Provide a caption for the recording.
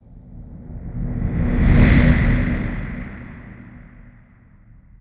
SFX. Fast metro passing by the station.
metro, Fast, passing